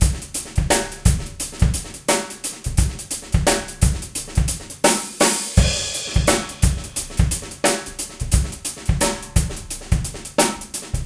Rock beat loop 19 - Roseanna sortof
Tried to do the Jeff Porcaro shuffle from Roseanna...
Recorded using a SONY condenser mic and an iRiver H340.
beat, drums, ghost-notes, jeff, loop, porcaro, rock, roseanna, shuffle, toto